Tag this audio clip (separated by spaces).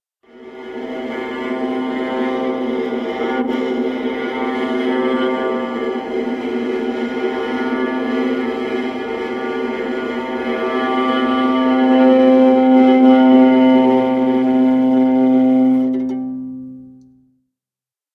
effects strings viola